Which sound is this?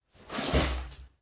Personaje se arrodilla
foley sound of soldier kneeling down
crouch, Foley, soldier